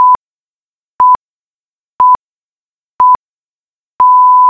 The five tones announcing new hour during radio broadcast. Made with Audacity generating sin 1000hz tones.
sinus, broadcast, five, tone, radio, hour